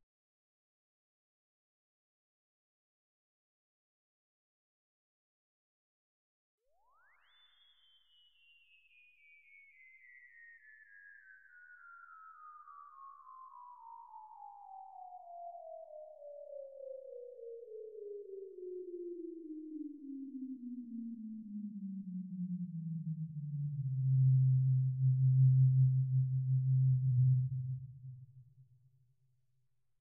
synth space ship landing